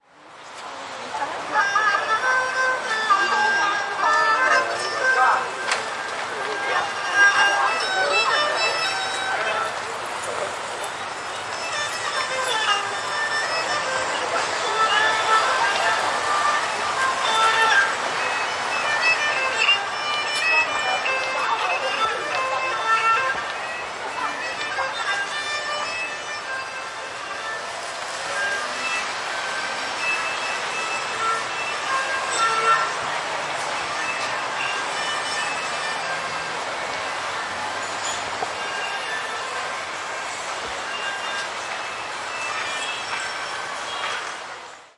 Autumn Lunar Festival 2018
Autumn Lunar Festival at the Lion Gate entrance to San Francisco Chinatown.
field-recording, chinatown, san-francisco, street-music, street-noise, city, street